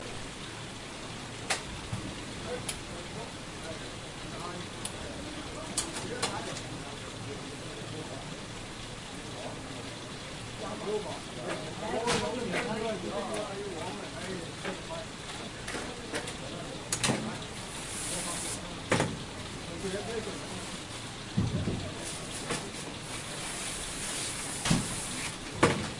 Fish Cleaning (Wild)
Cleaning and gutting a fish in a market in Chinatown, New York City
chinatown, cleaning, field-recording, fish, gutting, market, water